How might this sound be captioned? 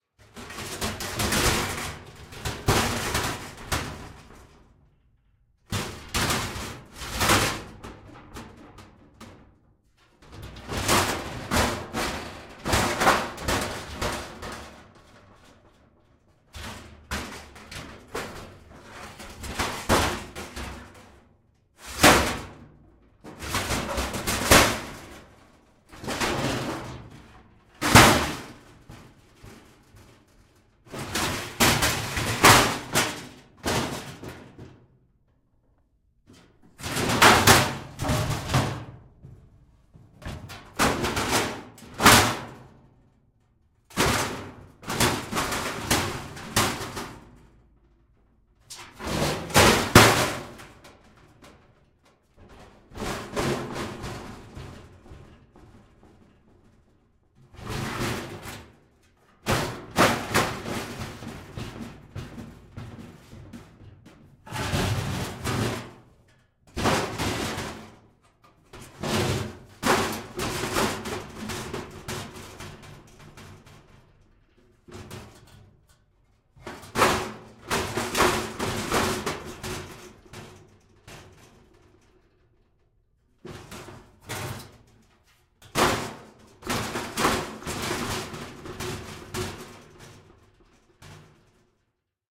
Metal,Rattle,Ambient
A great layer! With a bit of processing this file can satisfy any number of rattle-layer needs.
I also always love to hear about how it was used!